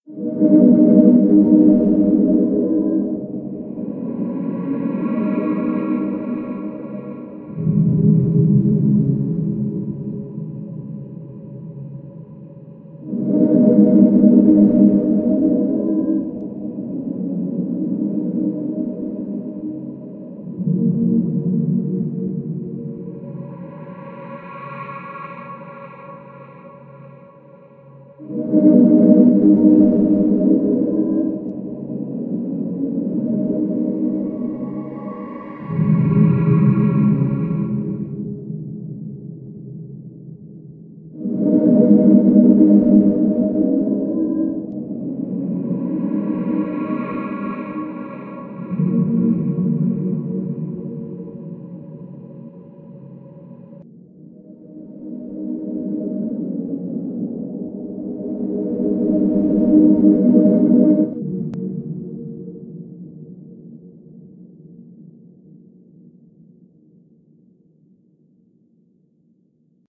Forlorn Revelations
Sad, melodic ambient track.
sad, sci-fi, melodic